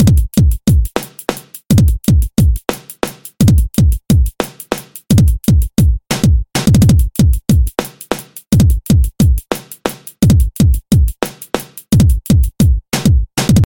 A drum pattern in 5/4 time. Decided to make an entire pack up. Any more patterns I do after these will go into a separate drum patterns pack.